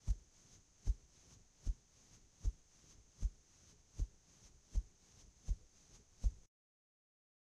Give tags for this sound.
beat
pump
heart

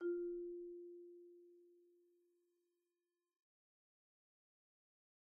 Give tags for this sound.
sample
idiophone
orchestra
instrument
hit
wood
mallet
organic
marimba
pitched-percussion
one-shot
percussion
percs

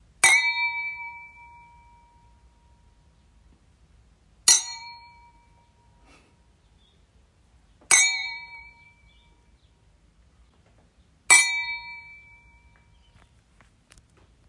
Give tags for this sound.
cheers
clink
clinking
glass
klink
OWI
toast
wine

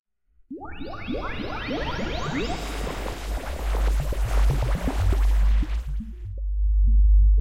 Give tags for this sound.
Bubbles
Spaceship
Warp
Aliens
Space
Phaser

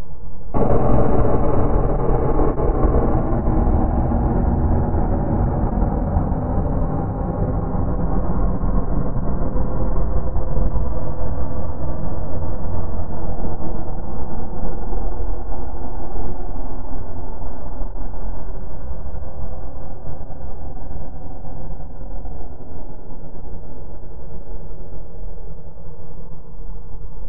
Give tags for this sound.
rocket
alien
takeoff

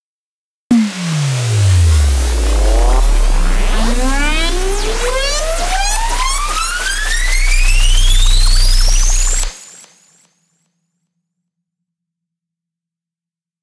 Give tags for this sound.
mix
instrumental
instrument
radio
music
jingle
trailer
broadcast
drop
fall
dj
deejay
electronic
podcast
interlude
sfx
slam
soundeffect
chord
send
radioplay
stereo
loop
effect
imaging
intro
noise
riser
dub-step
fx

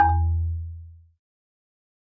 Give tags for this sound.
wood
percussion
instrument
marimba

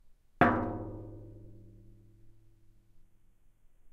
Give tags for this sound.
tank
metallic
muted
dull
hollow
hit
percussion

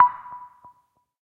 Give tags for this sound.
beep
positive
Game
app
menu
ui
button
press
giu
hud
accept

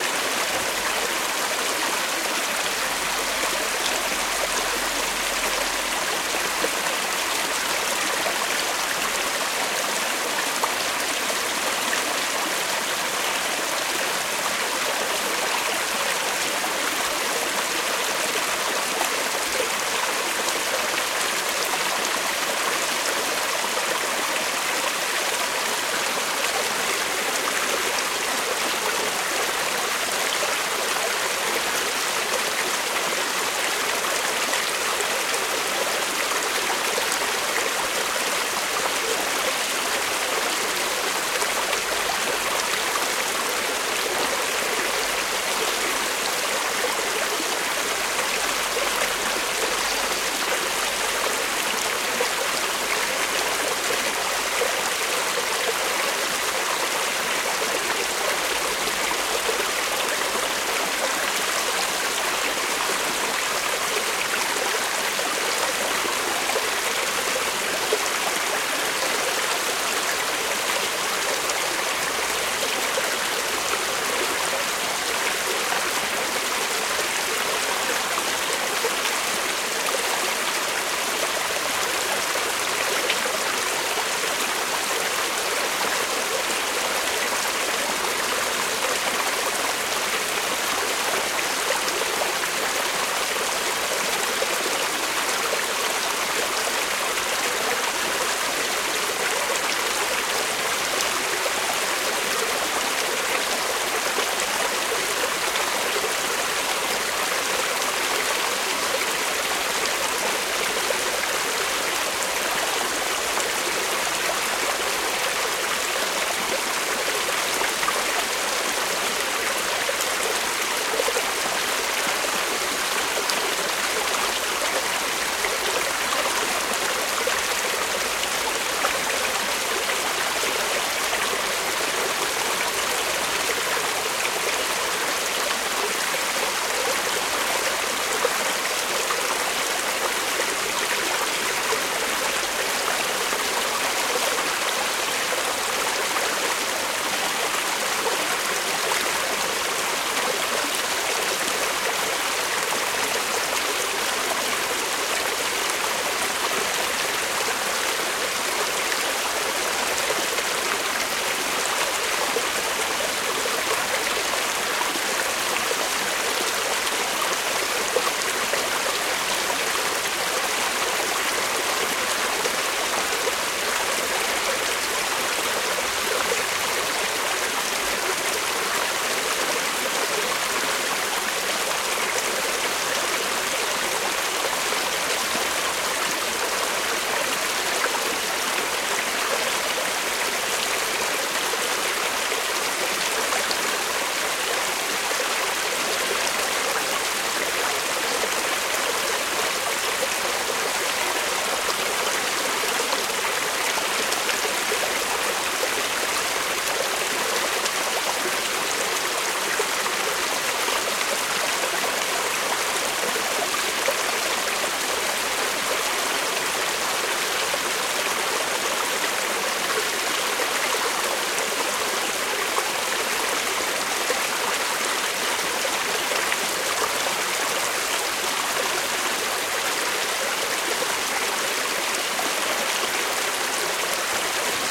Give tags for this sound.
liquid
river
Creek
babbling
Loop
Wood
Nature
water
flow
Forest
flowing

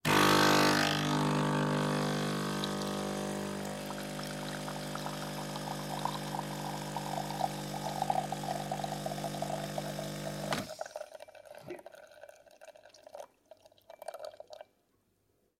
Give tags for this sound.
liquid
pump
mechanical

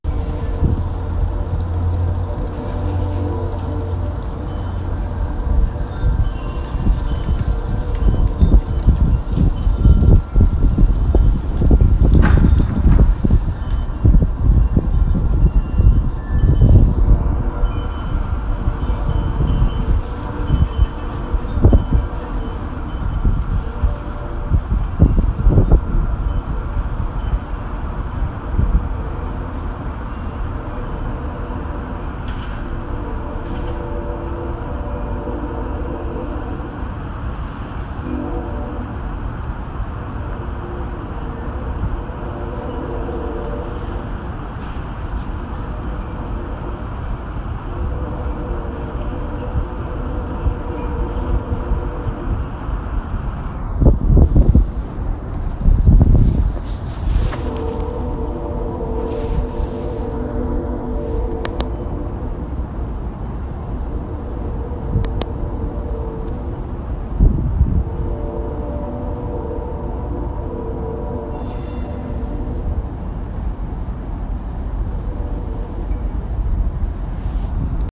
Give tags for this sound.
PNW
ambience
town
ambiance
cold
soundscape
bell
urban
trees
distant
park
city
jacket
field-recording
oregon
horn
wind
atmosphere
pacific-northwest
windchimes
rustling
nature
morning
chime
backstreet
ambient
train
chimes
footsteps
leaves

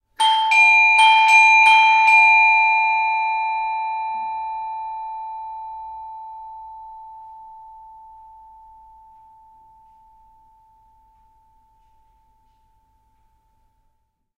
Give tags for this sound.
door
ringing
bell
doorbell
rings

